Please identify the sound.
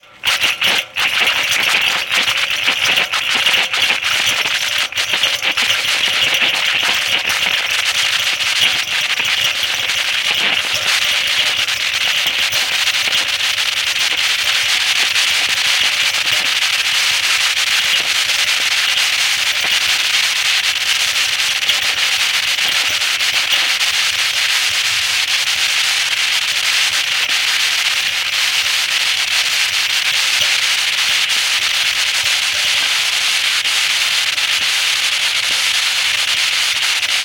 Radiator - Steam
Close-up recording of radiator blowing steam.